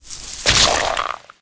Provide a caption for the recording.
This is a sound when a player walks into a spike trap.
Game, Spike, Trap